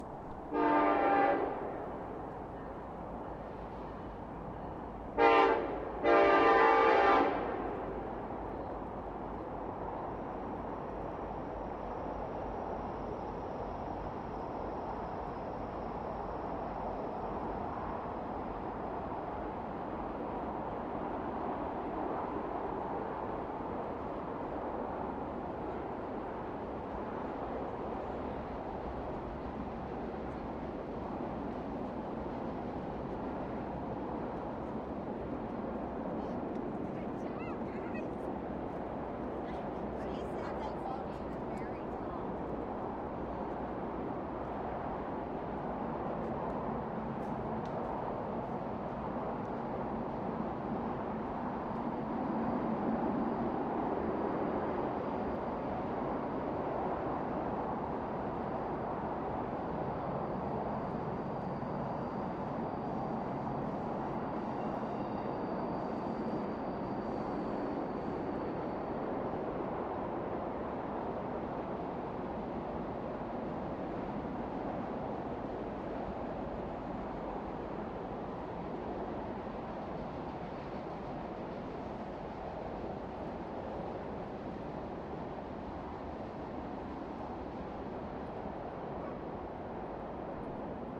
A train blows it's horn in the distance as it travels next to a busy highway.
traffic, Fast